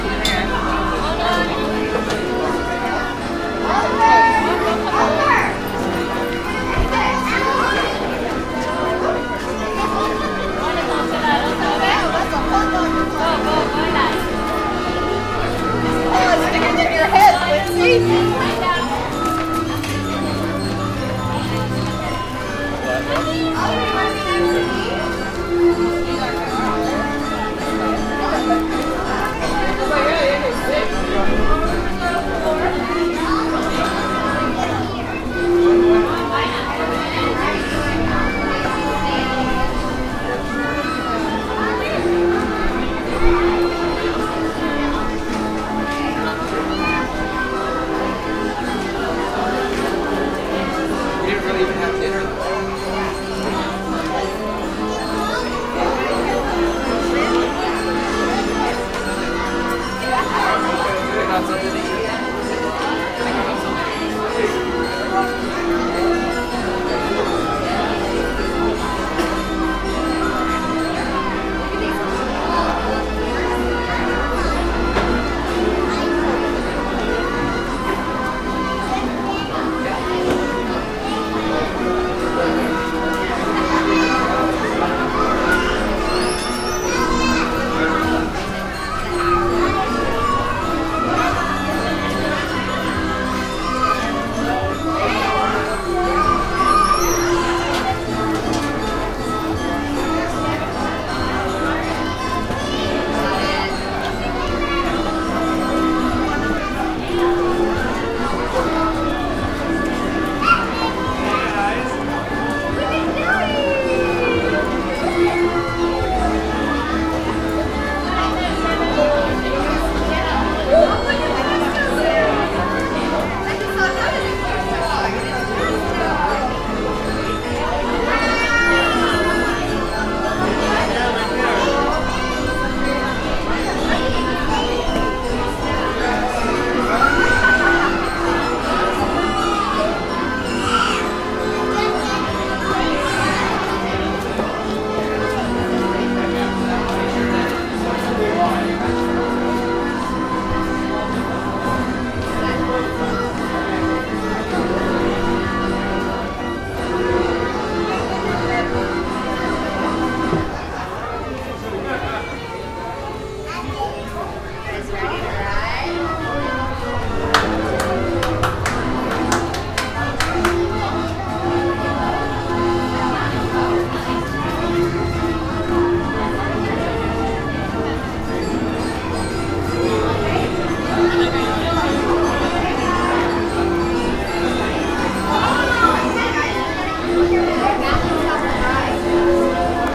Merry Go Round
Ambient sounds around the Merry-Go-Round in Griffith Park, Los Angeles, California. Children playing with the sound of the carousel in the distance. Recorded using a Sony PCM-D50 using the built-in microphones and a wind screen.
Band-Machine, Barrel-Organ, Calliope, Carousel, Field-Recording, Griffith-Park, Merry-Go-Round, Organ, Stereo